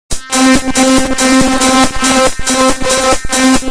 This Synth Sound can be used in hardtechno tracks or noize , breakcore tracks !